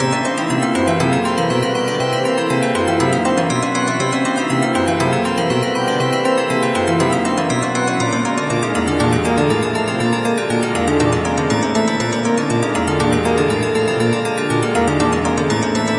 short loops 20 02 2015 4
made in ableton live 9 lite
- vst plugins : Alchemy
- midi instrument ; novation launchkey 49 midi keyboard
you may also alter/reverse/adjust whatever in any editor
please leave the tag intact
gameloop game music loop games dark sound melody tune church
game, games, church, tune, sound, gameloop, loop, melody, dark, music